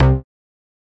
Synth Bass 001
A collection of Samples, sampled from the Nord Lead.
bass, lead, synth